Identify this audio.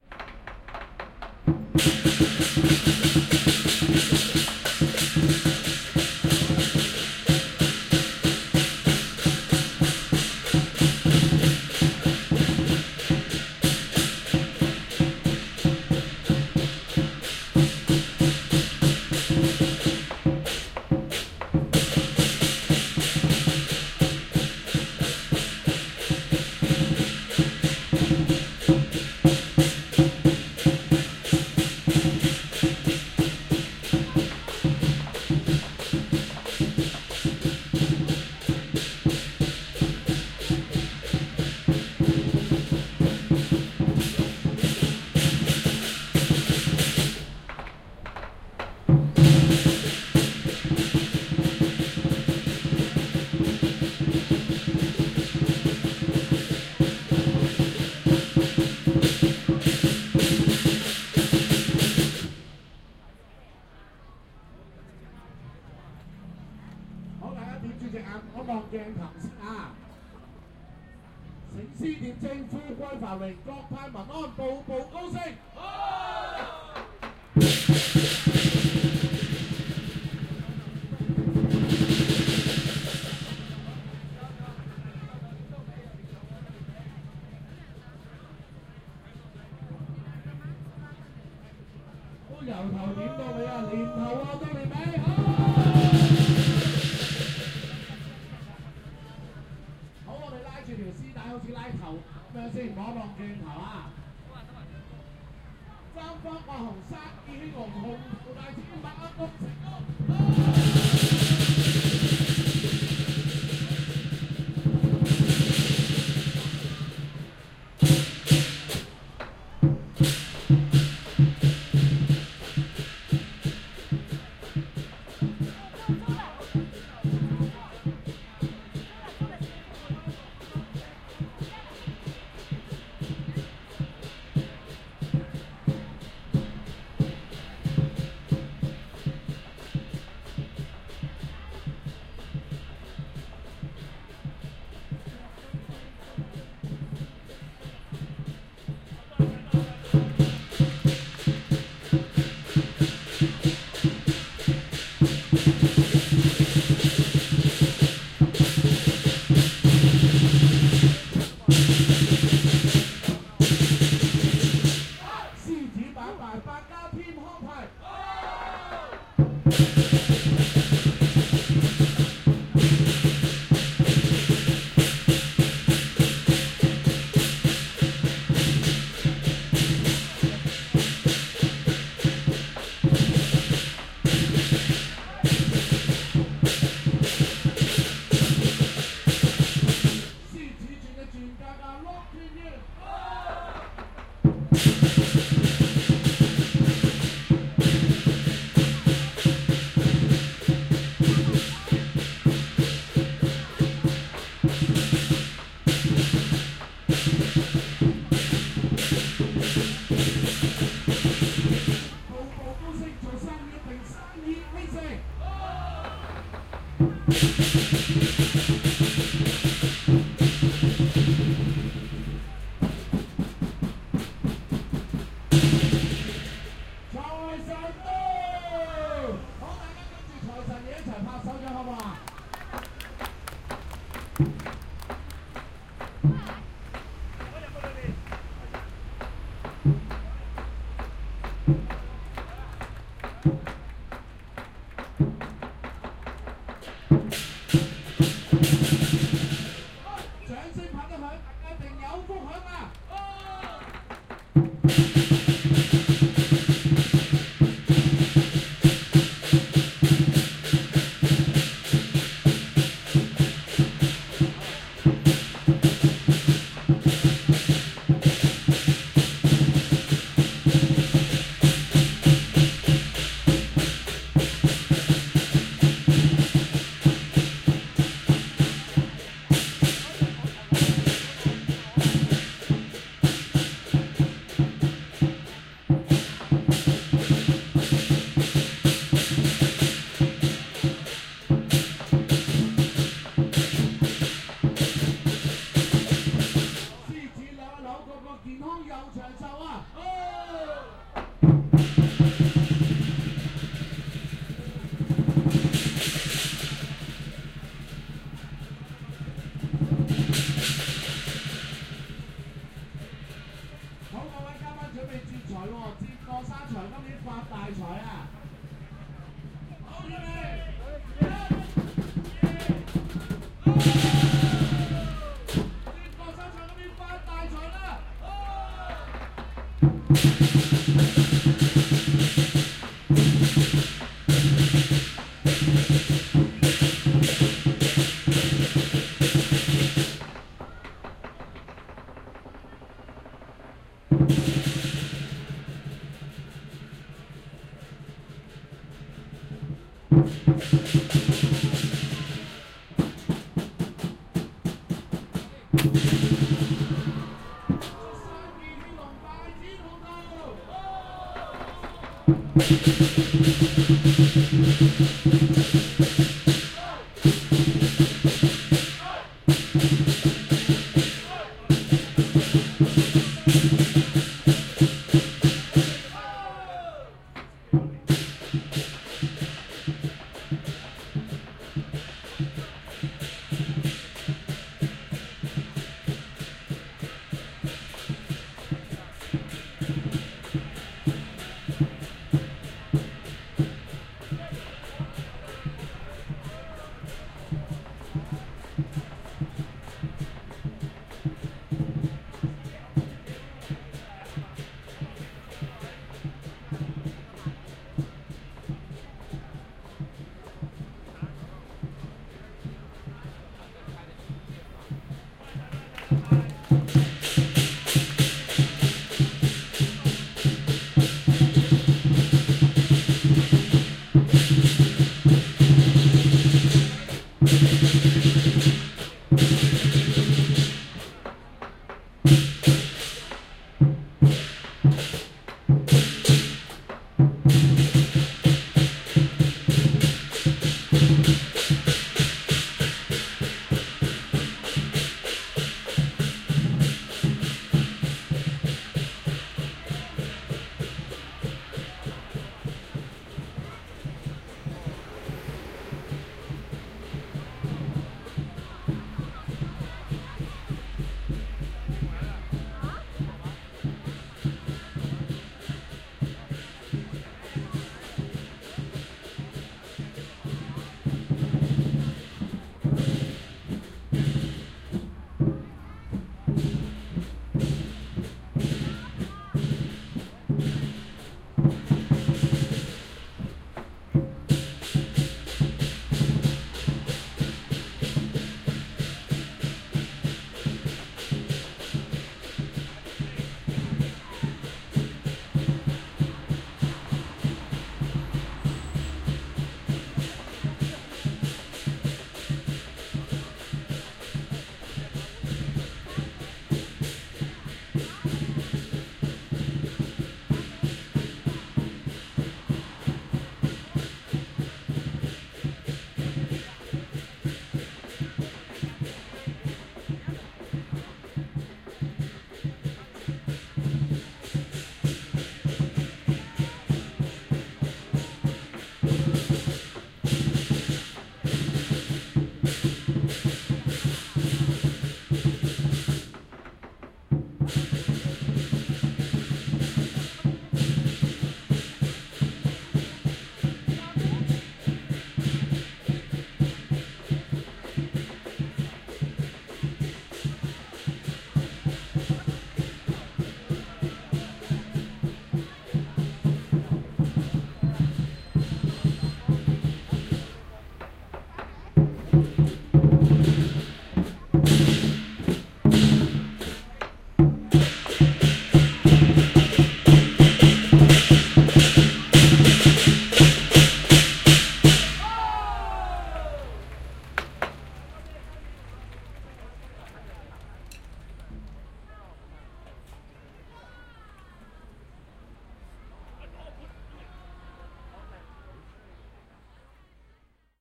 Chinese dragon dancing at Nathan Road, Hong Kong. (binaural, please use headset for 3D effect)
I recorded this binaural audio file on Nathan Road in Hong Kong, just when a Chinese dragon started to dance in front of the shops of this street.
You can hear the drummers playing while the dragon dances and jumps, and throws leaves and/or shinny papers from its mouth to bring good luck. You can also hear someone with a microphone, people talking and shouting when the dragon jump or throw the leaves or the shinny papers, and in the background, traffic and sounds from the street.
(please note that a similar file is available on my home page, but recorded with stereo microphones)
Recorded in February 2019 with an Olympus LS-3 and Soundman OKM I binaural microphones (version 2018).
Fade in/out applied in Audacity.